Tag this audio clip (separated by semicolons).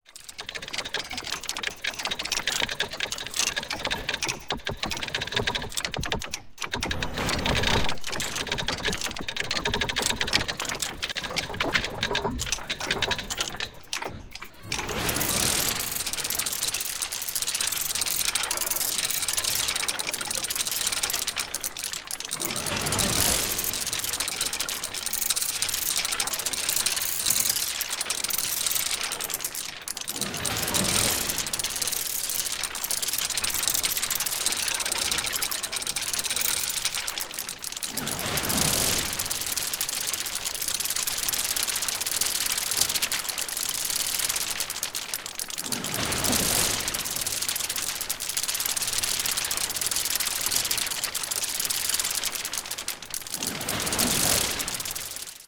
distorted; weird